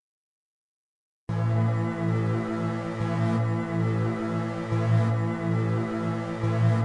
140 bpm sound fx 8
140 bpm dubstep sound fx
140-bpm, dubstep, sound-fx